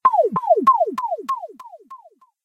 strange beam
animation, beam, cartoon, film, funny, game, laser, movie, video, wave